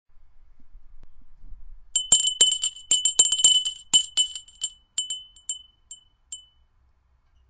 Bell, ringing, ring
Bell, ring, ringing